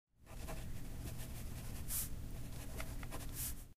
This is a pen writing on paper
folly, paper, scratch